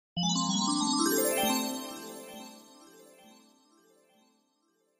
Here is a Level Up sound effect I came up with, using an arpeggio. The ways you can implement this, are limited by your imagination, couple ideas:
Bejeweled-Style Game/App (Chained/Multiple Wins Sound Effect)
Any RPG/MMORPG Mobile/Non-Mobile Level Up Sound Effect
I don't know you name it! :D
Additional Notes: If you use this asset, and you release/finish your project, Please share with me, if you allow so, I would love to see where my assets have been used, it also keeps me motivated to do more things!